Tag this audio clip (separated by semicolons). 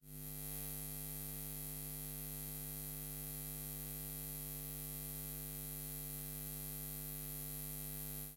zapping; voltage; watt; design; socket; buzz; sparkling; electrical; sparks; electric; electricity; volt; sound; effects; plug; zap; fuse; shock; audio; glitches; ark; arc; amp; ninja; spark